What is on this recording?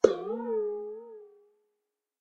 Bowl With Water 3
A stereo recording of a stainless steel bowl that has some water inside it struck by hand. Rode Nt 4 > FEL battery pre amp > Zoom H2 line in.
boing, bowl, metallic, oscillation, percussion, stainless-steel, stereo, water, xy